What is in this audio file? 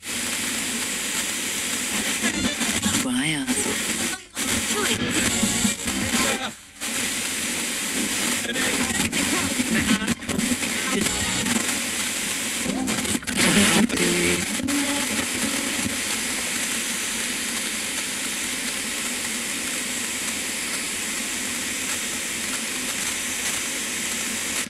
Household Radio Scan Static
Scanning, Household, Radio, Static